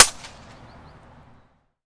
This is a free recording of a concrete/stone corner outside of masmo subway station :)